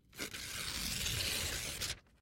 Recorded on a Tascam DR-100 using a Rode NTG2 shotgun mic.
Slow paper rip that can be used for slow paper rips ;)
cut, light, paper, rip, ripped, ripping, sheet, slow, tear, tearing